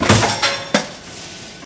die single 3
die, industrial, machine, factory, field-recording, metal, processing